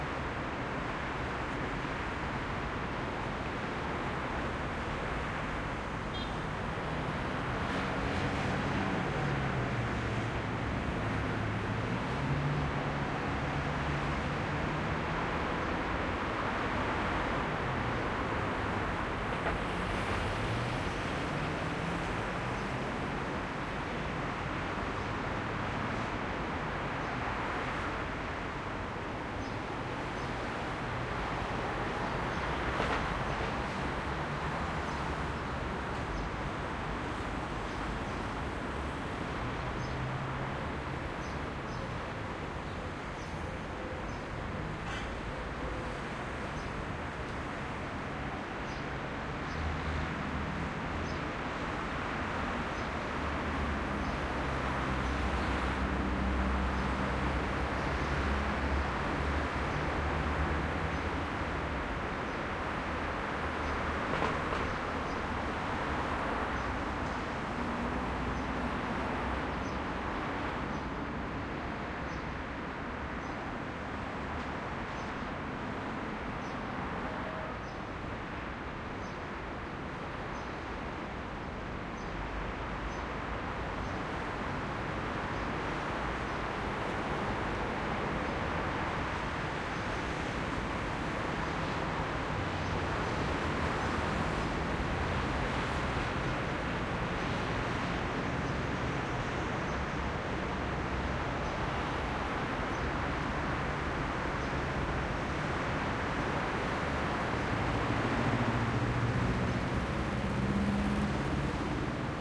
traffic skyline light haze +birds and manhole cover port Marseille, France MS
France, haze, light, Marseille, skyline, traffic